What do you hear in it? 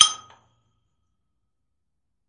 Tank of fuel oil, recorded in a castle basement in the north of france by PCM D100 Sony
fuel, oil